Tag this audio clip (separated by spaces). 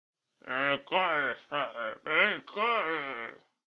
male voice